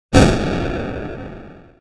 bit bomber2
Short, low resolution, white noise with volume and filter envelope. 8-bit Atari game explosion.
digital-noise, explosion, gameboy, lmms, lo-fi, noise